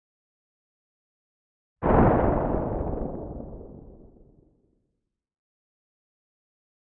Synthesized using a Korg microKorg